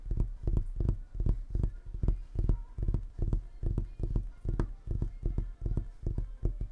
fingers tapping on softwood desk
drumming, fingers, human, tapping